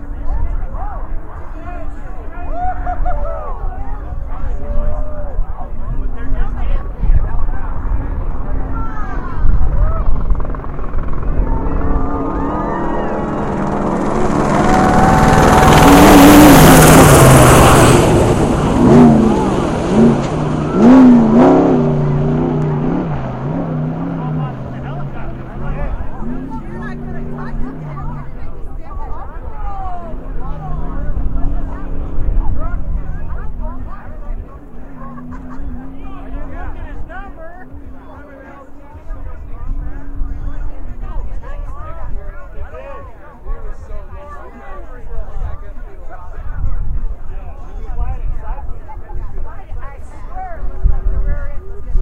Trophy Truck and Helicopter at Baja 250
Recorded this a few years back with my tascam. The trophy truck came less than 20 feet from the recorder while a helicopter flew 40 - 50 feet above our head. Dust was everywhere and engines were roaring.
1000, 250, baja, by, desert, engine, fly, helicopter, horse, horsepower, offroad, power, racing